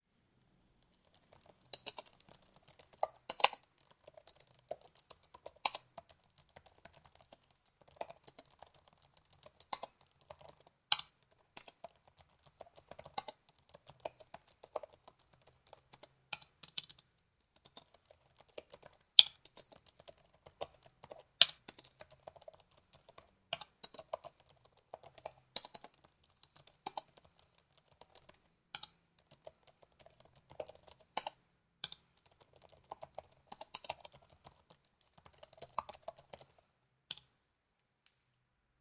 013 - Laptop Keyboard.L
Sound of a laptop keyboard while typing.